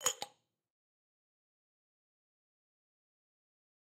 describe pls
Glass lid off
The sound of a glass lid being removed from a jar. Achieved with glass, a Zoom h6 and some small EQ and layering.
Composer and Sound Designer.
glass, cap, closing, jar, tube, close, alchemy, foley, opening, lid, test, drink, bottle, top, open